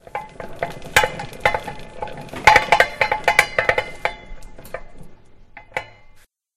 This sound is when someone finds a book and turns a wheel for moving the shelves.
library, campus-upf